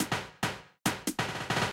140 bpm drum loop fill 4
140 bpm drum fill loop
140-bpm, drum-loop